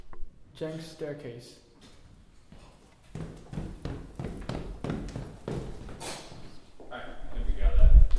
Jenks Staircase Footsteps
Recording of footsteps in a library staircase
Footsteps, Jenks, Resource, staircase